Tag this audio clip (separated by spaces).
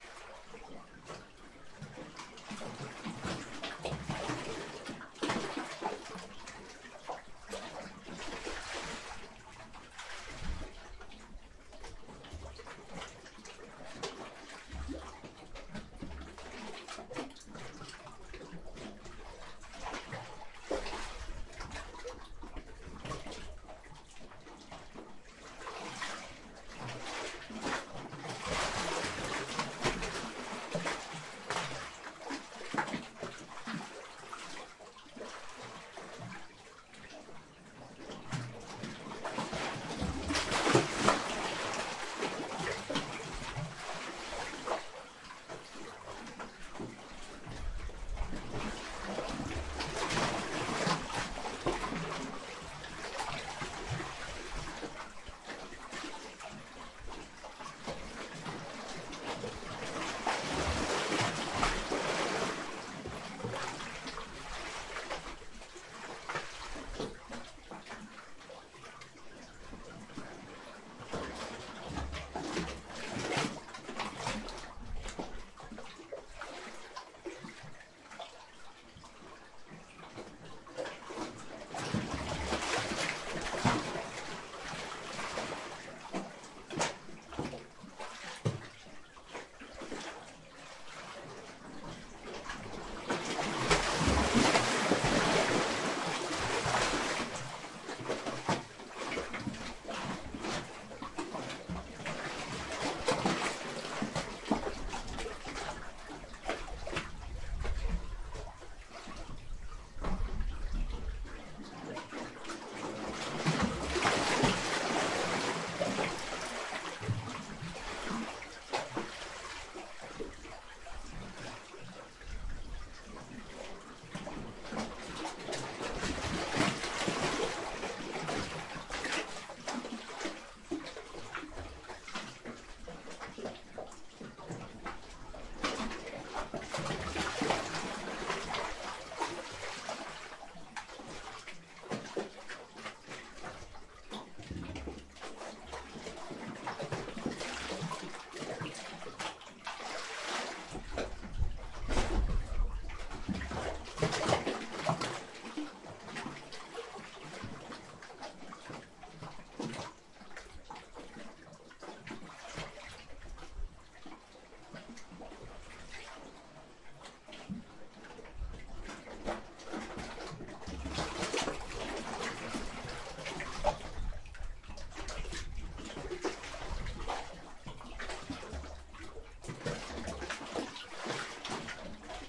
sea coast beach cave shore bathtub ocean seaside water waves cavern rocks